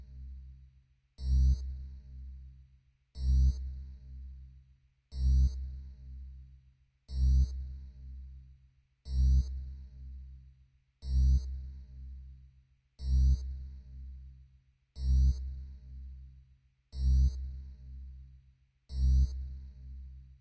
Alarm sound 4
A futuristic alarm sound